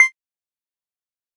a short tone (4)
a short tone
sfx, sound-effects